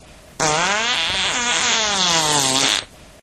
Funny assed fart
poot, gas, flatulence, explosion, fart, flatulation